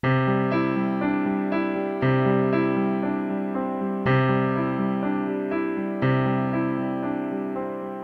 piano-loop in C-major
piano-accomplisment with left hand, to replace bass or use as intro.
Yamaha-clavinova, cinematic, piano, loops, piano-bass, background, 100bpm, dark, 120bpm